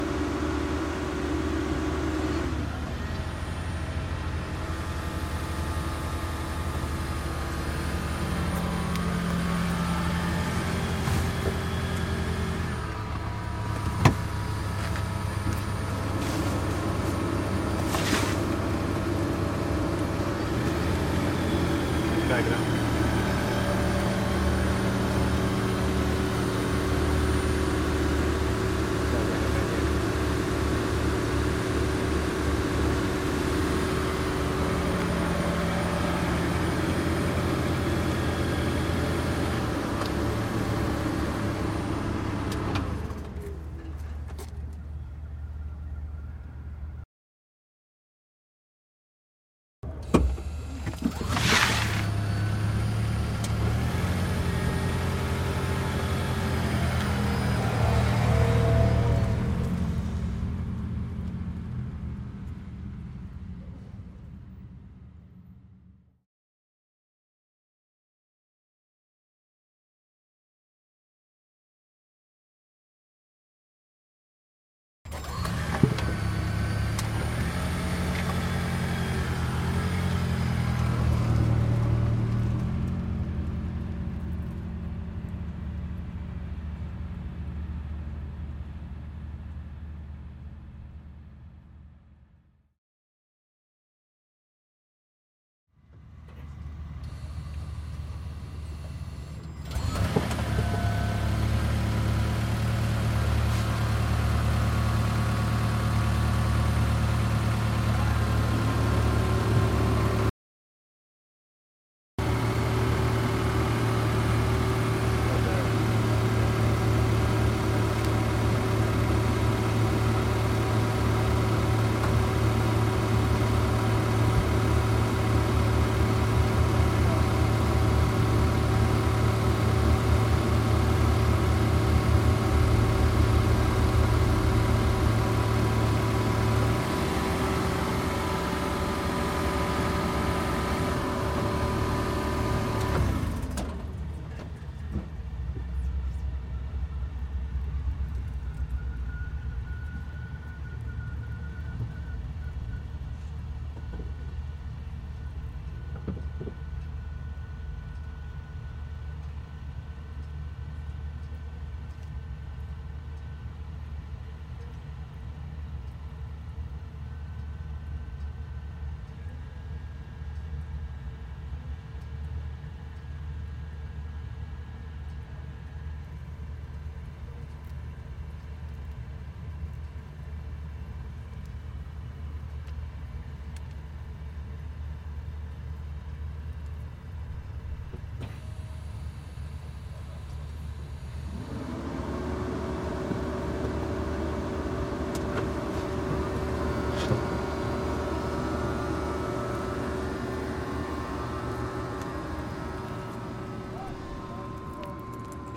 auto Prius ext engine pull up idle stop long shut off start pull away slow various pieces
auto, away, engine, ext, idle, long, off, Prius, pull, shut, slow, start, stop, up